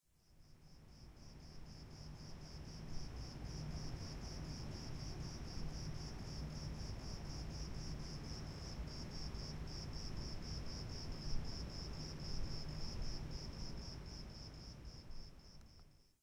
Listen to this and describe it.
Crickets at night
crickets, night